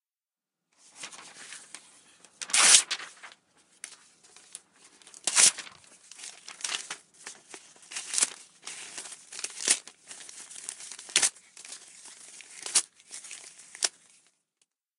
tearing paper3
Tearing up a piece of paper.